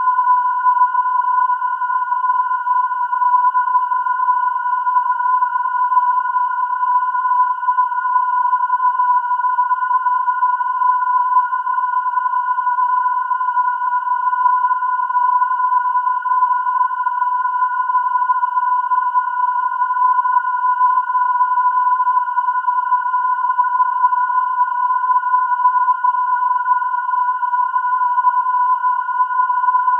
female creppy vocal
white noise equalized trying to match female voice formant frequencies
female, generated, noise, vocals, voice